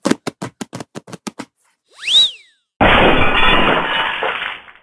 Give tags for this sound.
accent; accident; break; cartoon; comedy; crash; feet; foley; footsteps; funny; gallop; glass; hit; hurry; running; shoes; slip; smash; steps; whizz

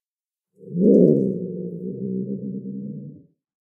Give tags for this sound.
Animal; Attack; Fight

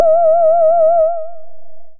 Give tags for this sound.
pulse; reaktor

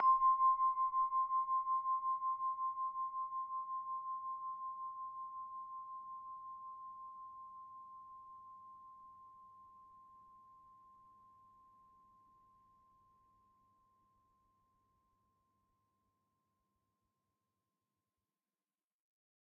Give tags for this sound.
crystal-harp; hifi; sample

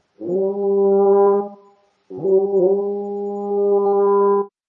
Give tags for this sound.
hunt; hunt-horn; hunting-horn; horn; hunting

